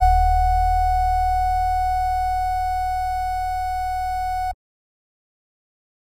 beeeeeeeeeep. Time of Death: 9:37 PM (date the sound was uploaded)
A simple sawtooth and sine mix produced on a midi interface in REAPER with ReaSynth.